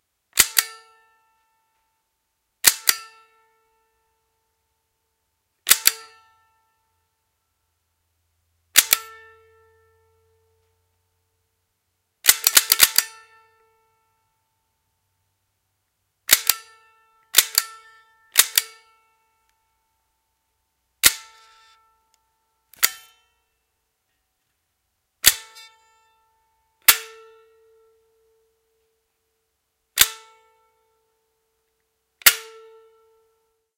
One of my Zapper lightguns, an accessory of the Nintendo Entertainment System. I tried to give a variety of trigger pulls.
Recorded with a CAD GXL2200 microphone.
nintendo, trigger